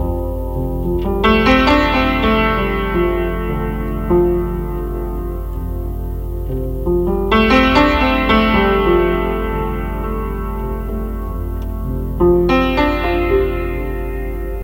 This is a piano loop; I played this on an ancient creaky piano. It has a shipping forecast flavour; the beginnings of something???
Hearing is seeing
atmospheric, creaky-piano, piano, shipping